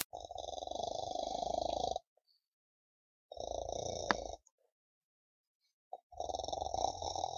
Noise In The Woods
I was walking in the woods and the birds stop singing then this noise was made. I figured out how to copy it but this is the real noise.
Interesting, Strange, Woods